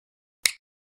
Clicksound
Recorded with a Sony MZ-R35
stone, lego, click